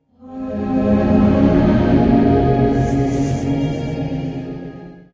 a short cut of ERH Angels made to fit my need (and yours...)
angel, voice